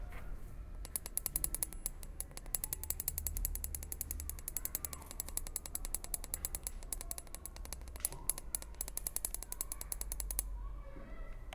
mySound AMSP 07
Sounds from objects that are beloved to the participant pupils at the Ausiàs March school, Barcelona. The source of the sounds has to be guessed.
Barcelona, CityRings, Spain, mySound, AusiasMarch